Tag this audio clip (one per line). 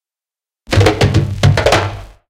acoustic
fills
sound-effect